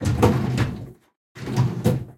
Steel Drawer Open & Close
A drawer being opened and closed.
metal, close, slide, cabinet, drawer, open, steel, opening, metallic, closing